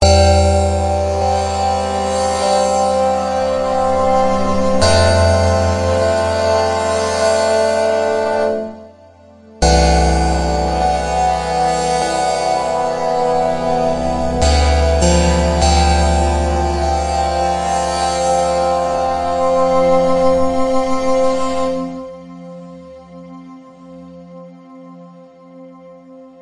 Atmospheric Ambient / Lounge Pad (JH)
This sound was created using "Vital" synthesizer
BPM 100
100, Atmospheric, Background, Bell, BPM, Chillout, Clock, Deep, Freeze, Lounge, Psybient, Relax, Slow, Soundscape, Synth, Synthesizer, Vital